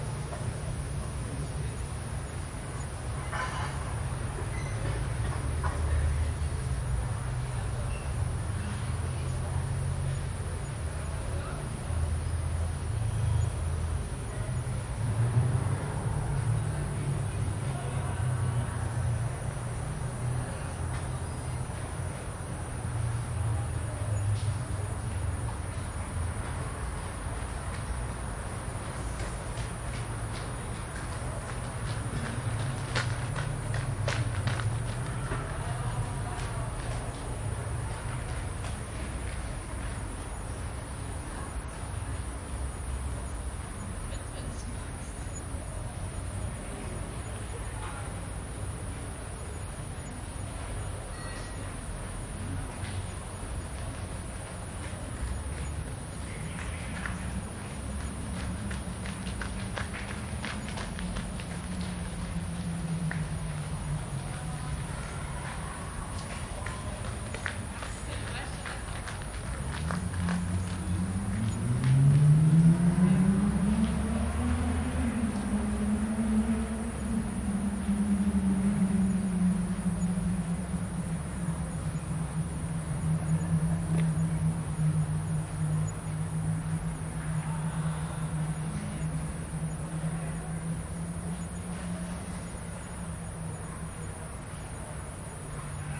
Park in the City by night, jogger, no birds - Stereo Ambience
Little park in the late evening, surounded by apartment houses, almost no traffic, runners passing by, summer in the city
atmosphere, ambient, atmos, stereo, ambience, background-sound, atmo, ambiance, background, field-recording